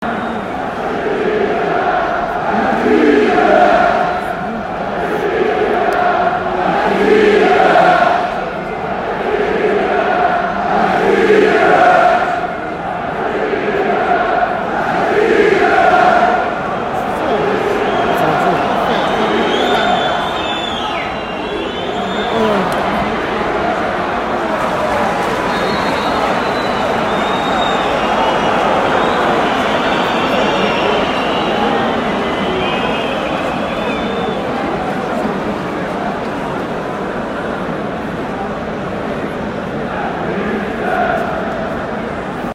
Benfica - stadium
The sound of football match in a stadium.
football, match